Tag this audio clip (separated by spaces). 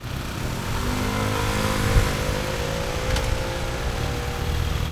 acceleration guitar motor motorbike SonicEnsemble street UPF-CS12